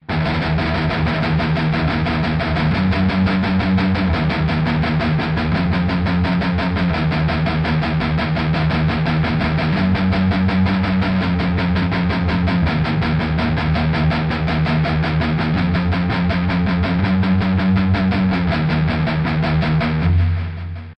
heavy guitar riff
Heavy metal riff created using eletric guitar.
This file is 100% free. Use it wherever you want.
chug, electric-guitar, heavy-metal, metal, riff